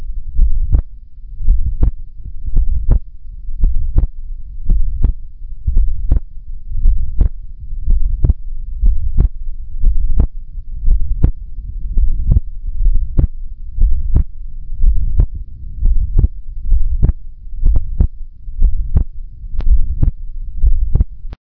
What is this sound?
Heart Beat Slow
Slow heart beat--can be sped up or slowed down for different effects.
heart-beat, heartbeat, mono, six-sounds-project